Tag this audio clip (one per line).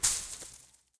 Rattle
Arbusto
Hit
Moita
Bush
Shrub